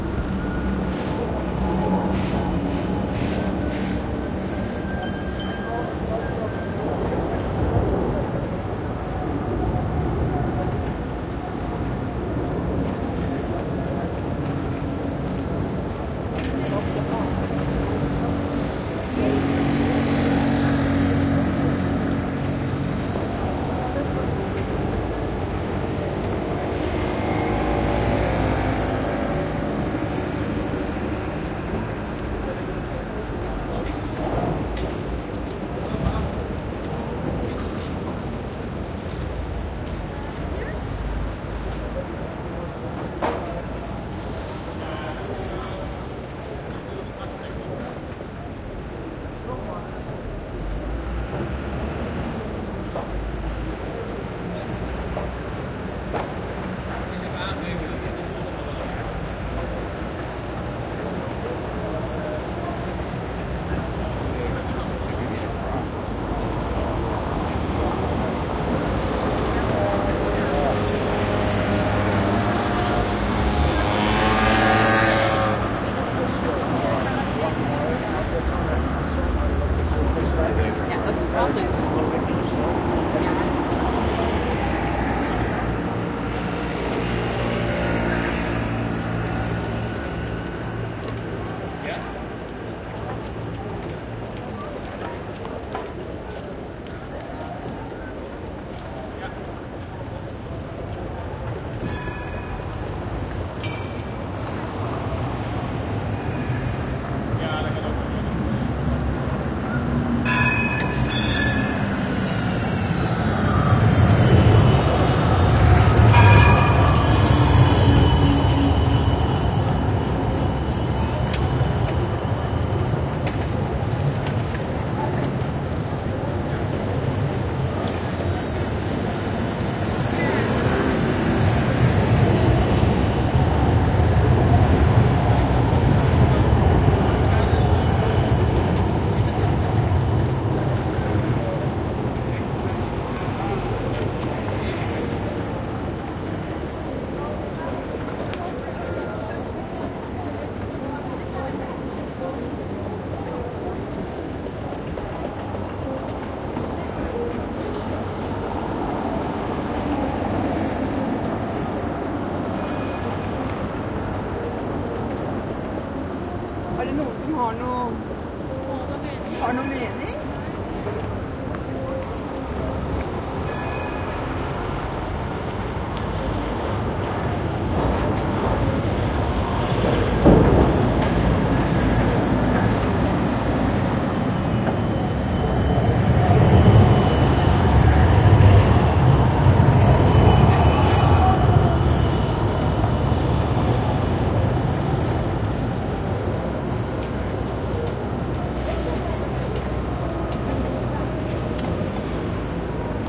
Recording at the Spui in Amsterdam